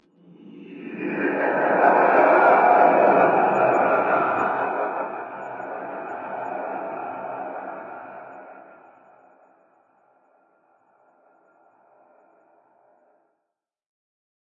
noise horror ghost
I created this sound with my mouth then I changed greatly with my usual audio programs. Primarily using the majority of my VST effects in Adobe Audition CS6.
horror, noise